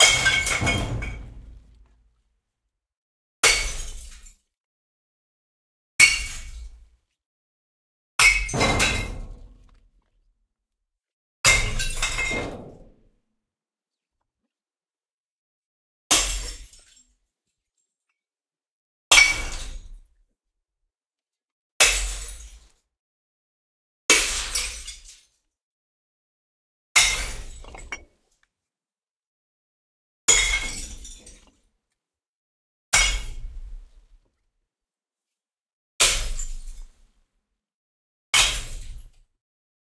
These are field-recordings of glass bottles breaking when thrown in a container.
The sounds were recorded with a Zoom field recorder and a cardoid microphone.